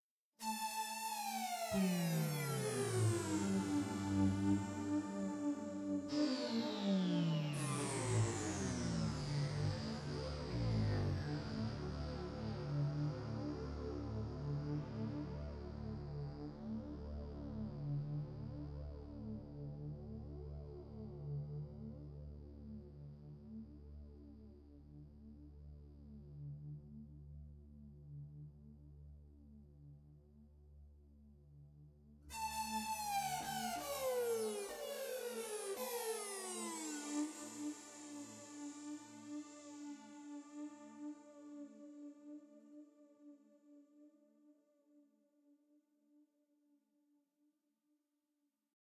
falling, portamento fX sounds created with the Roland VG-8 guitar system